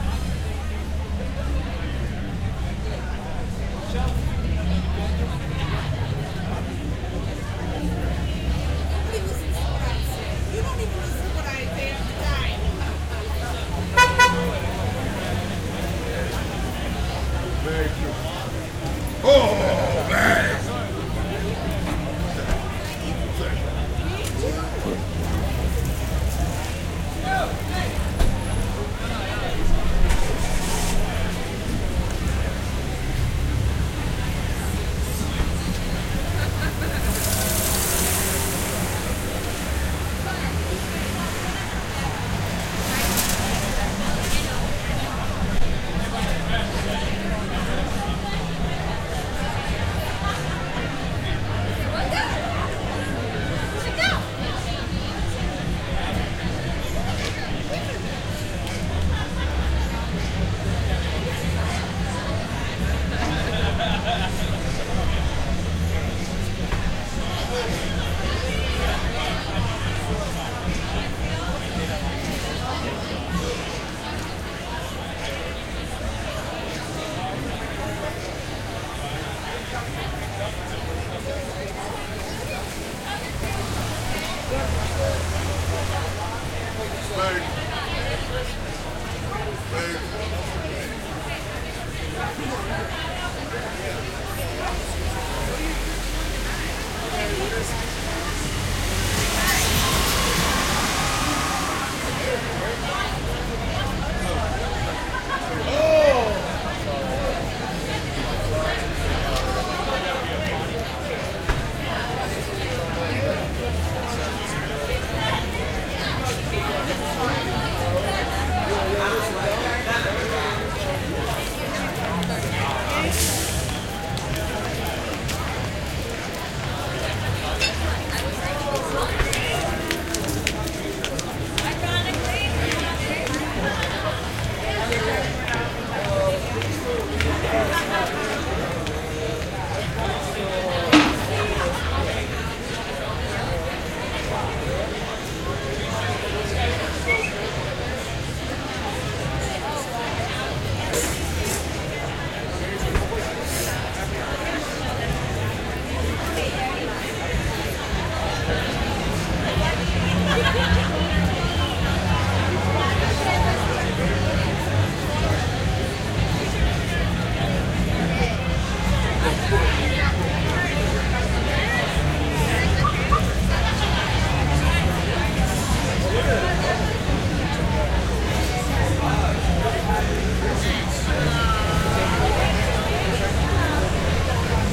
street corner outside club busy people, cars pass wet
people, corner, wet, outside, busy, club, cars, street, pass